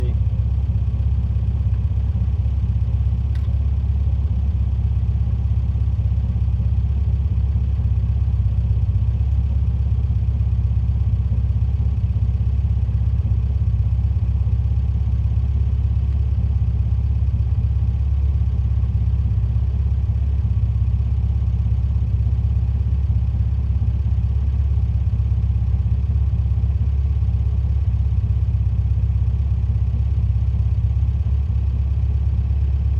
Car idle vintage MB convertable
Vintage 1970's MG convertible
vehicle, engine, Car, idle